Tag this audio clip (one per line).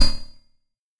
electronic
industrial
percussion
short
stab